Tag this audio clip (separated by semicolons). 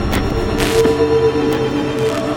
atmosphere; baikal; electronic; loop